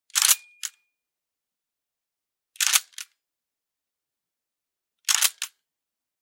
GUNMech M1 GARAND SLIDE PULL BACK MP
Field recording of an M1 Garand slide being pulled back. This sound was recorded at On Target in Kalamazoo, MI.
grand, gun, wwii, mechanism, slide